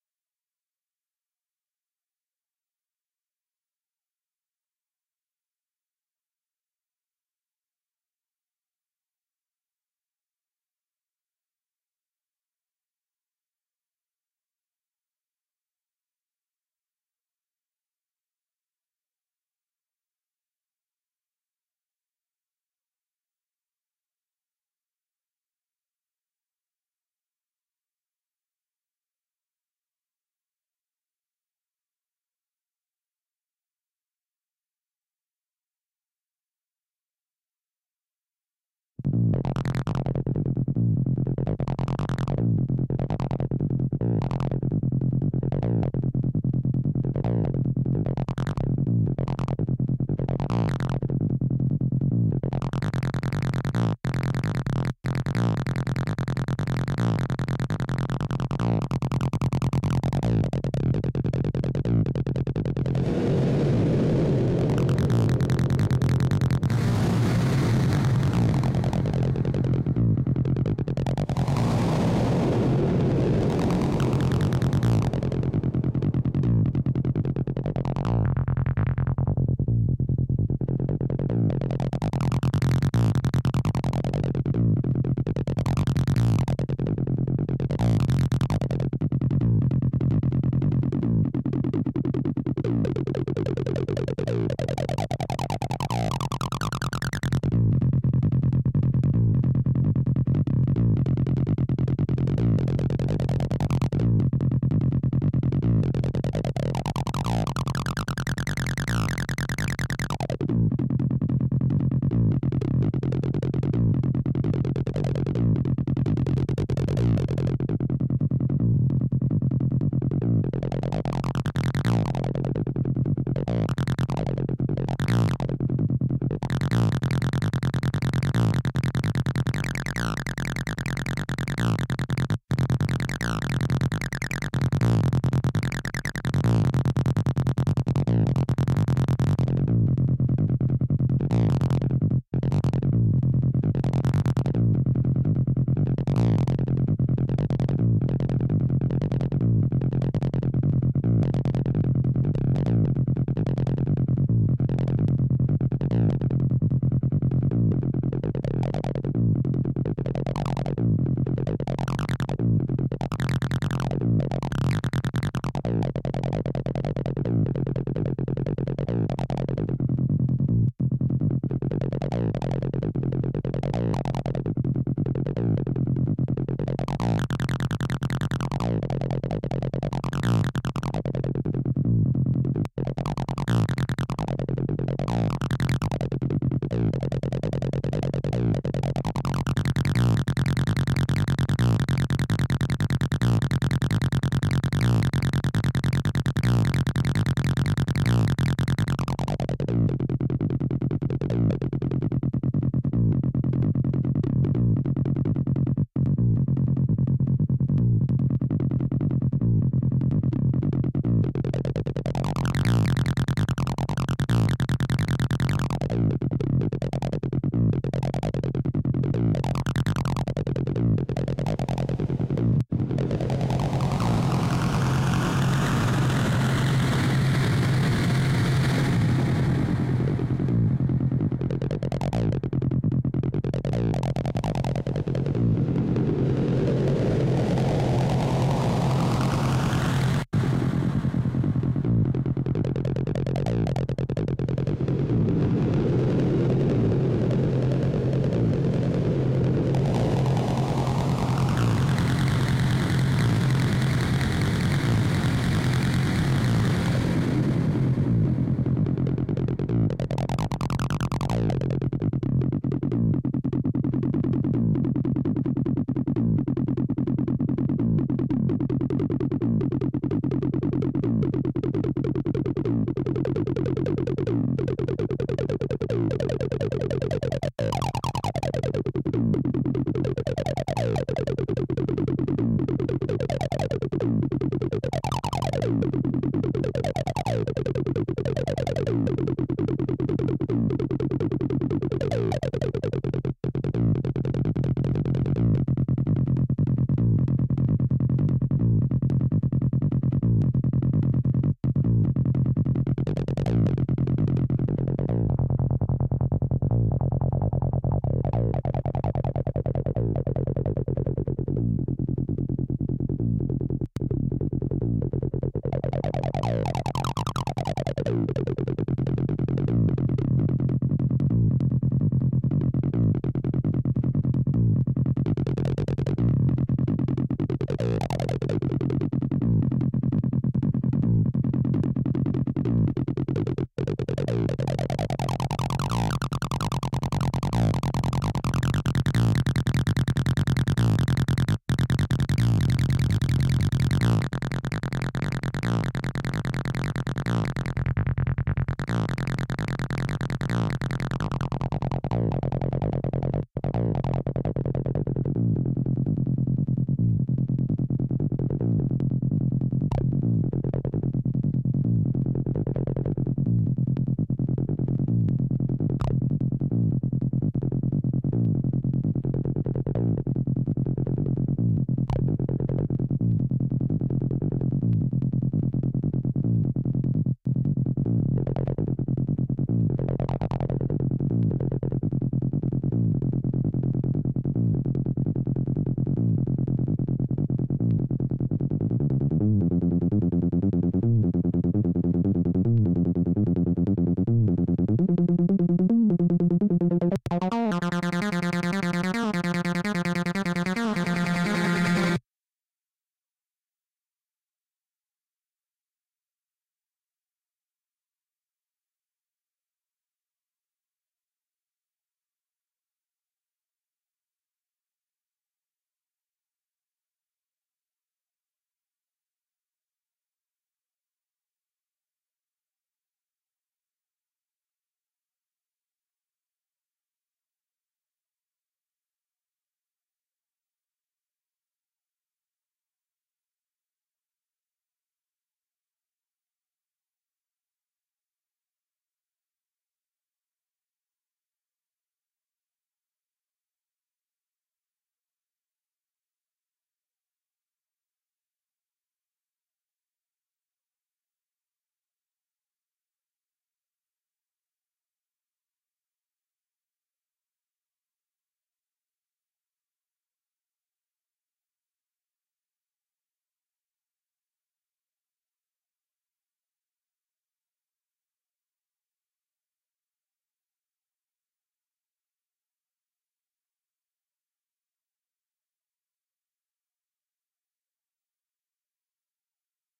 Acid sound for sampling, recorded with Roland TB-03
Acid, Psychedelic, Experimental, Mental, TB-03